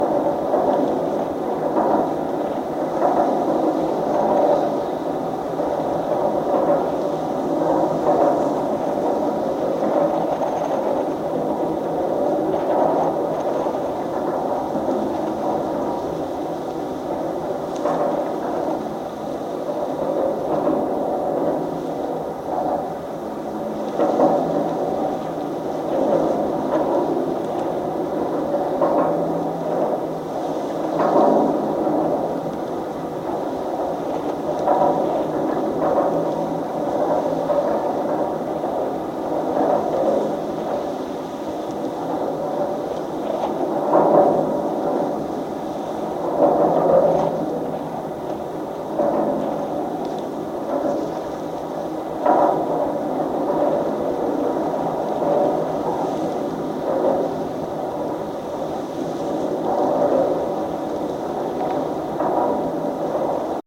GGB 0407 Suspender SE07SW N
Contact mic recording of the Golden Gate Bridge in San Francisco, CA, USA at SE suspender cluster 7, SW cable. Recorded August 20, 2020 using a Tascam DR-100 Mk3 recorder with Schertler DYN-E-SET wired mic attached to the cable with putty. Normalized after session.
suspender, contact-microphone, cable, San-Francisco, wikiGong, contact, DYN-E-SET, bridge, Tascam, Schertler, contact-mic, steel, field-recording, Golden-Gate-Bridge, mic, metal, DR-100-Mk3